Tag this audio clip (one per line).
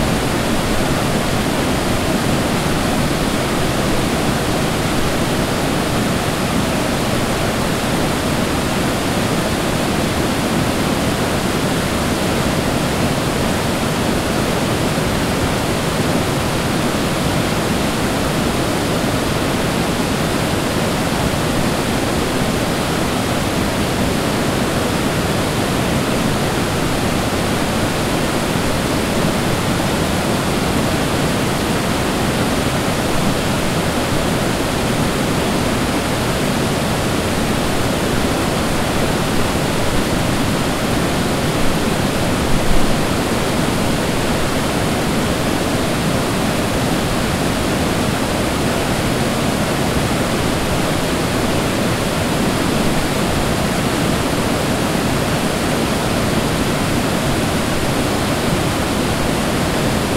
forest
lake
national
waterfall
ypsilon